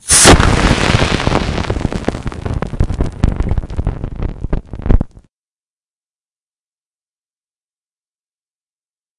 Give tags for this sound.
air
beer
bubbles
can
distortion
explosion
gas
loud
open

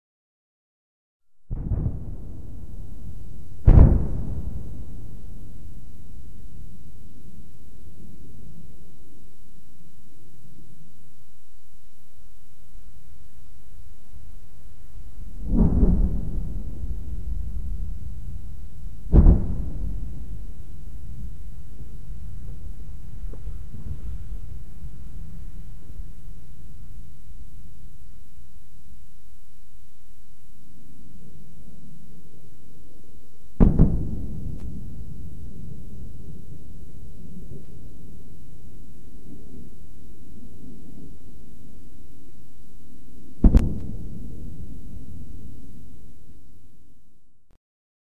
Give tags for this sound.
away
boom
explosion
far
sonic
sound-barrier

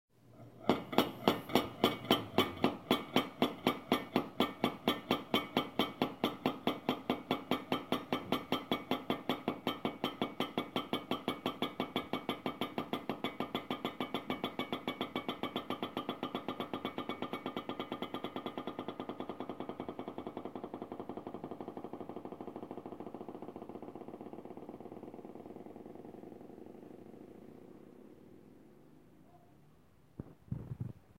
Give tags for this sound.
percussion
pans
pot
annoying
kitchen
metal
pan
Lid
rocking
metallic
pots

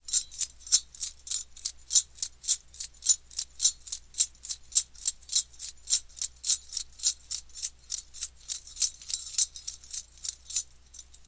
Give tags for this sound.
keys
MTC500-M002-s14
pitch

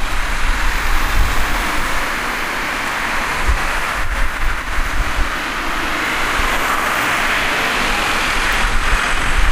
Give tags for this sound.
cars
noise
road
traffic

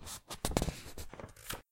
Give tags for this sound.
open
book
journal
turning
paper
game
reading
folder
anime
newspaper
flip
pewdiepie
magazine
page
turn

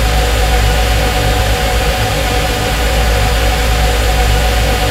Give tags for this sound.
Sound-Effect
Soundscape
Freeze
Background
Perpetual
Everlasting
Still
Atmospheric

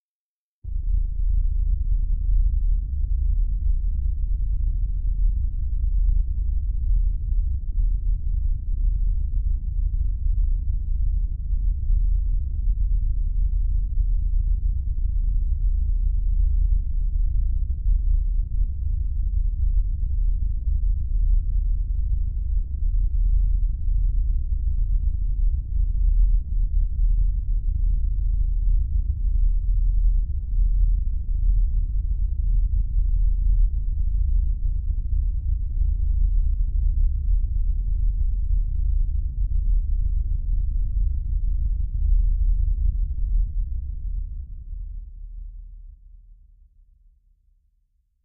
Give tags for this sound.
future
ambient
futuristic
sci-fi
energy
impulsion
deep
starship
hover
background
space
electronic
emergency
drive
spaceship
atmosphere
engine
sound-design
machine
rumble
dark
fx
Room
effect
bridge
noise
ambience
pad
soundscape
drone

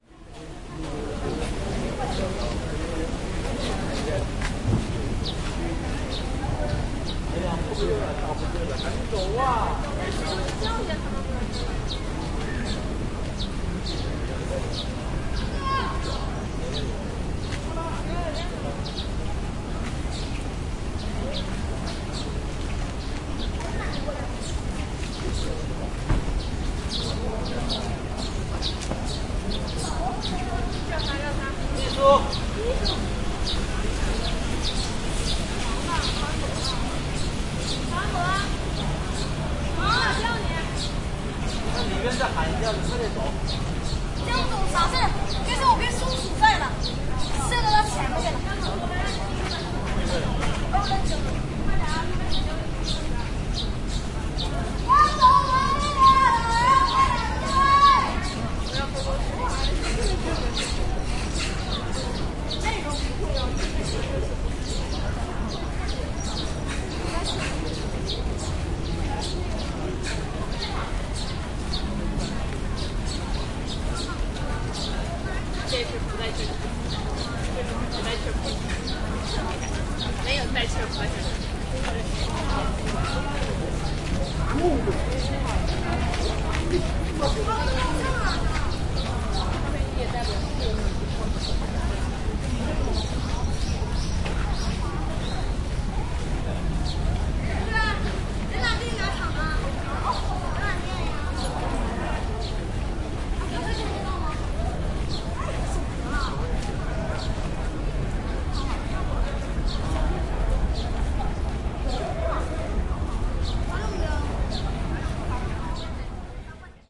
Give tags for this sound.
korean
seoul
korea
voice

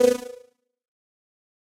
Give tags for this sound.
effext
jungle
game

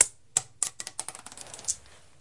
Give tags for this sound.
rock
stone

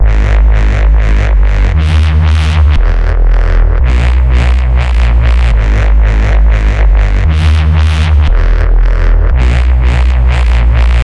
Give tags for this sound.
bass
bassline
drum
n

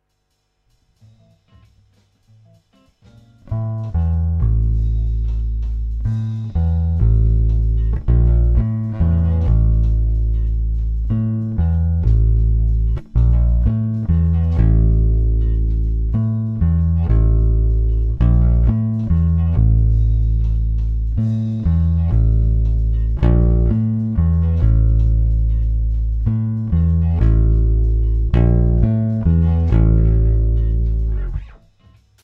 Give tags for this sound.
bass
funk
jazz
sample

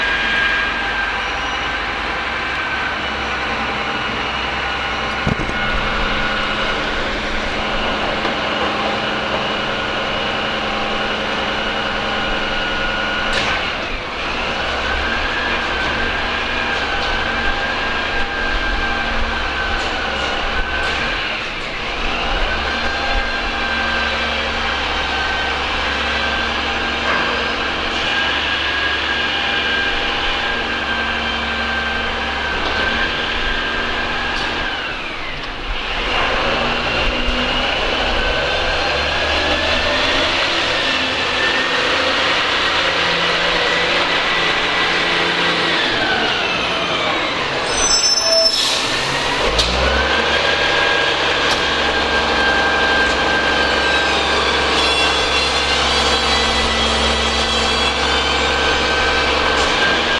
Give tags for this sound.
field-recording
london
street
refuse-collection

